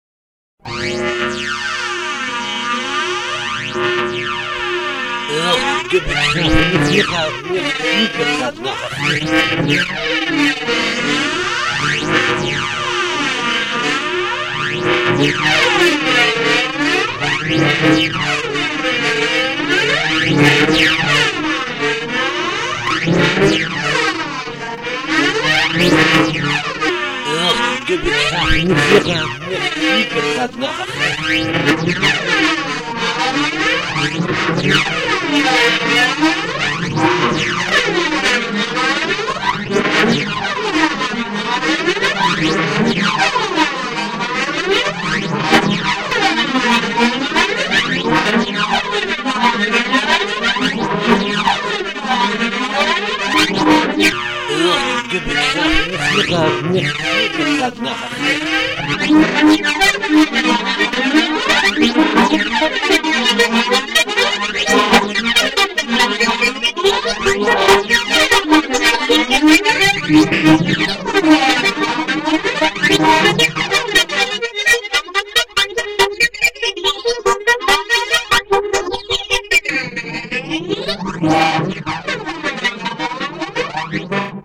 Entertainment onboard space cruiser 'Enterprice'. A klingon plays the mouth harmonica called grfffpf on klingon.
aliens, harmonica, mouth, sci-fi, space, starship